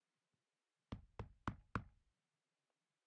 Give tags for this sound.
door; knock; knocking-on-wood

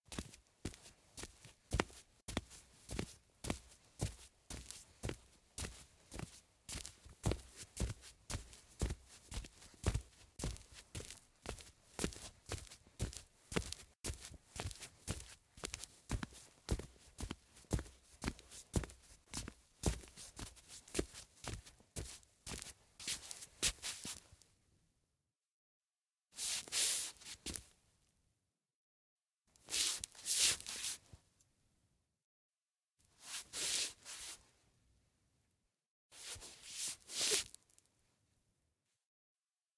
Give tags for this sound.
footsteps; parquet; surface; walk; steps; slippers; feet; wood; walking; hardwood; floor; step; soft

